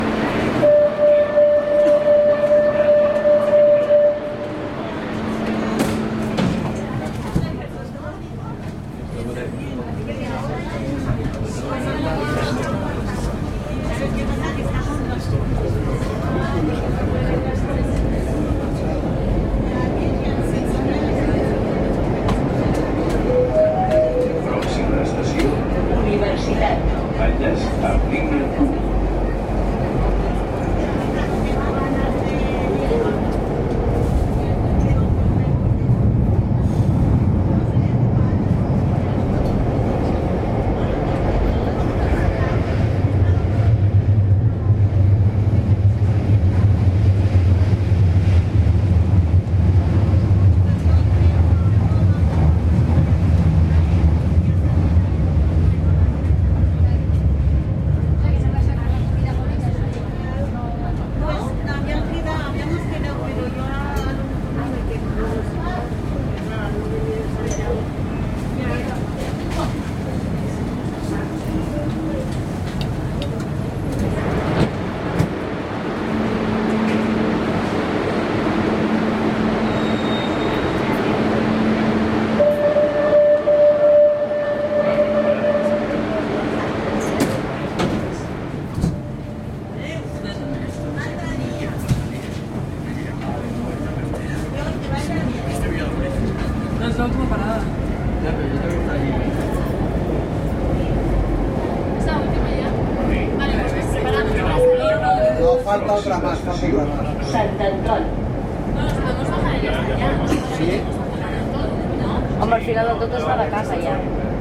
metro underground ambient opening closing doors noisy transport catalan spanish barcelona
ambient, closing, doors, metro, noisy, opening, transport, underground